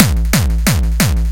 xKicks - UpTight
Do you LOVE Hard Dance like Gabber and Hardstyle? Do you LOVE to hear a great sounding kick that will make you cry its so good?
Watch out for This kick and Several others in the xKicks 1 Teaser in the Official Release Pack.
xKicks 1 contains 250 Original and Unique Hard Dance kicks each imported into Propellerheads Reason 6.5 and tweak out using Scream 4 and Pulveriser
180, 180bpm, bass, beat, dirty, distorted, distortion, drum, gabber, hard, hardcore, kick, kick-drum, kickdrum, single-hit, style, techno